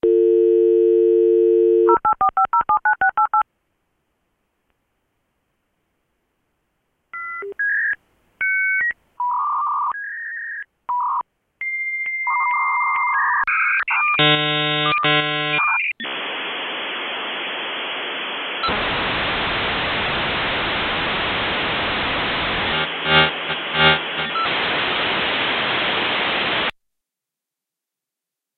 Recorded direct from my laptop when I used to use a dial-up connection. This sound represented many, many lost hours.
computer, modem, electronic, telephony, vintage, bleeps